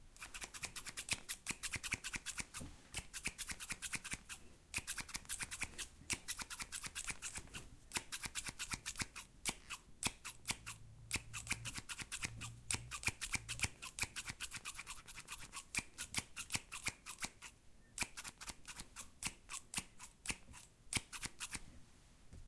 fast scissors
Scissors cutting at a fast pace.
fast-cutting-scissors, scissors, scissors-cutting-fast